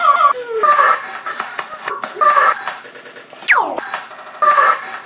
- REC 190bpm 2020-08-23 02.23.13
amen, beats, breaks, drum, loops